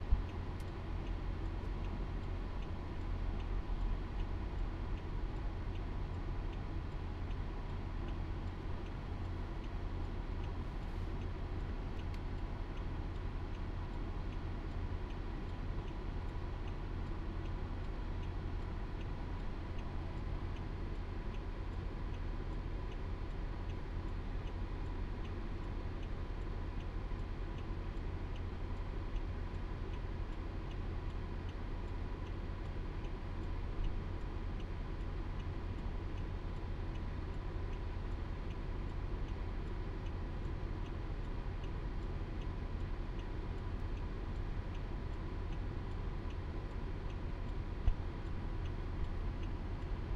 The inside of my chevy, sitting at the stop with the turn signal